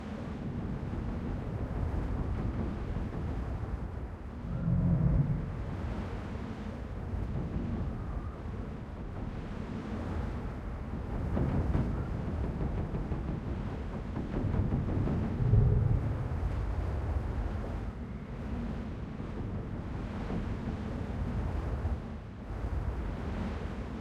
Ambiance Wind Strong Warehouse Loop
Loop - Strong wind into a Warehouse (metal rattles & movements)
Gears: Zoom H5 - Rode NT4 (designed sounds)
ambiance, ambiant, ambient, atmosphere, background, background-sound, blowing, gust, house, howling, loop, metal, metallic, storm, strong, warehouse, white-noise, wind, windy